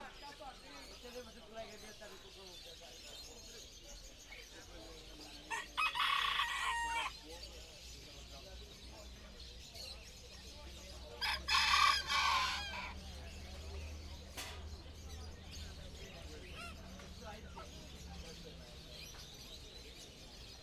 porto birdmarket cock
Porto, Portugal, 19.July 2009, Torre dos Clerigos: Atmosphere of a birdmarket with humans chatting. Two times a cock screams clearly.
birds, athmosphere, voices, cock, city, smc2009, morning, birdmarket, porto, field-recording